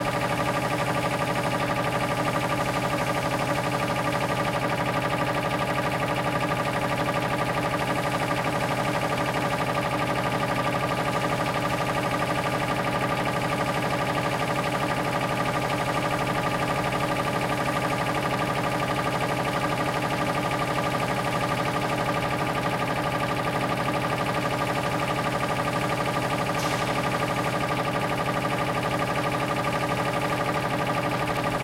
Compressor Motor
Sound of compressor for some machine in the metal workshop in Croatia.
compressor, generator, Machinery, Mechanical, Motor, Operation, Workshop